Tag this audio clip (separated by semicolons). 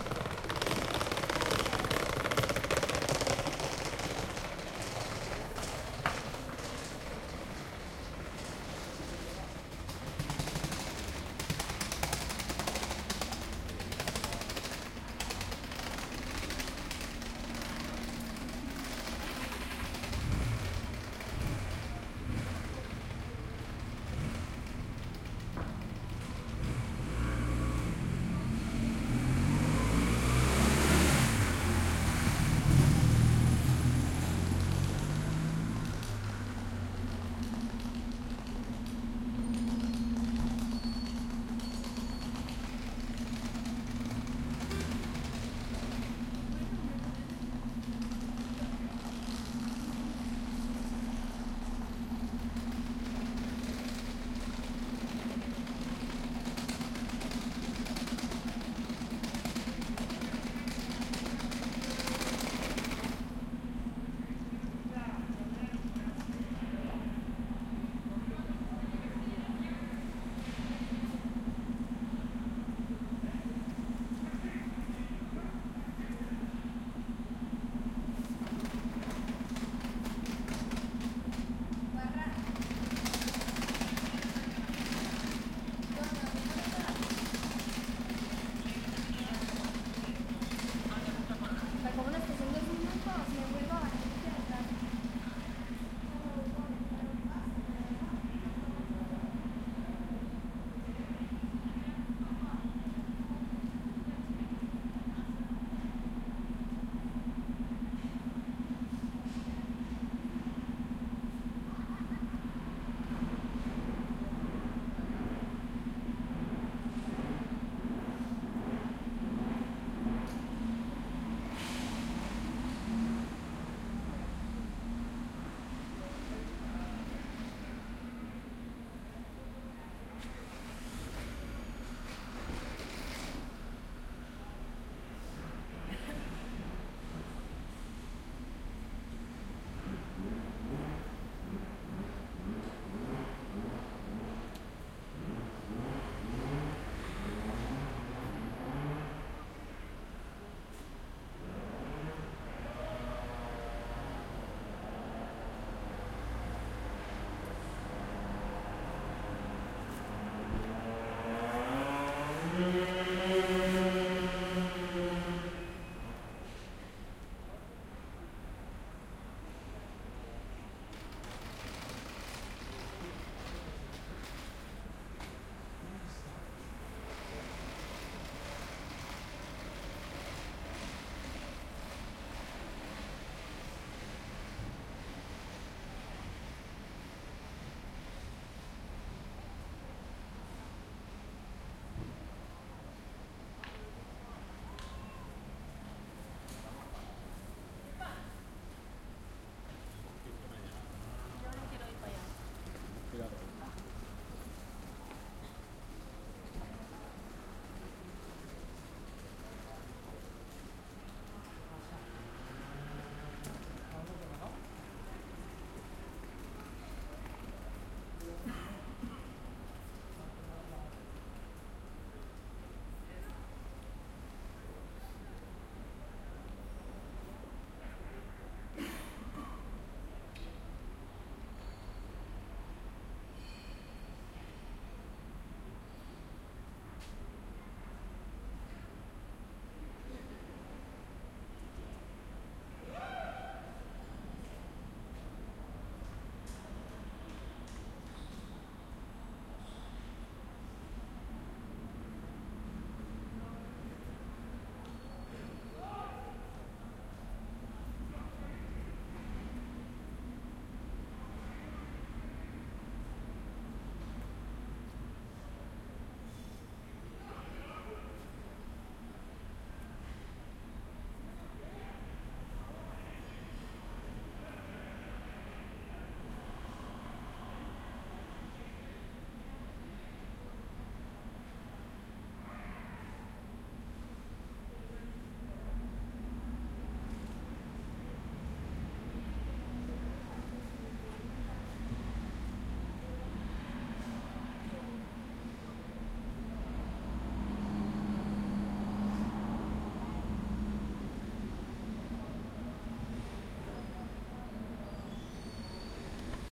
talking; travelling; coach; walking; Train; crowd; Valencia; bus; travel; movement